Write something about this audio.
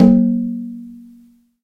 Bata Low Dry
This is the low end and low end of my Meinl Bata Drum! (Iya)
Single, Drum, Bata, Hit, Drums